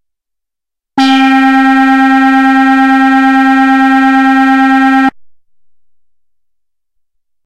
SW-PB-bass1-C4
This is the first of five multi-sampled Little Phatty's bass sounds.
analog, bass, envelope, fat, little, moog, phatty, synthesizer